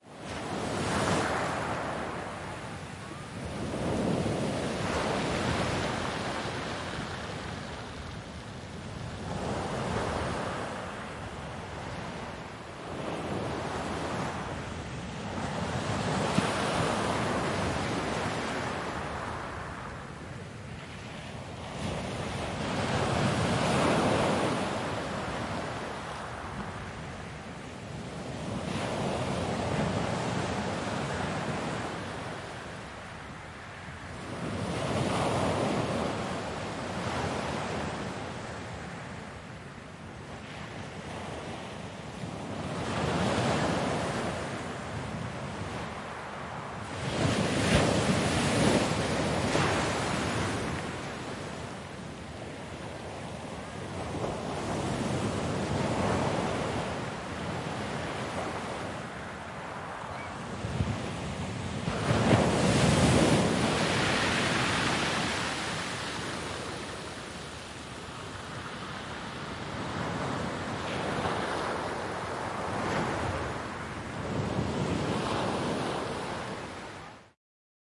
Field recording of waves breaking on Felixstowe Beach in Suffolk, England. Recorded using a stereo microphone and Zoom H4 recorder close to the water to try and capture the spray from the waves. Wind shield was used but a little bit of wind exists on the recording with a HPF used to minimise rumble. This recording has some distant chatter and children playing on beach.